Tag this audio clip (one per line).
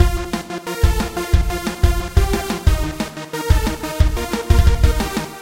drumloop
beat
trance
techno
loop
drum